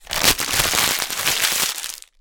A sheet of paper is scrunched up into a ball in this sound recording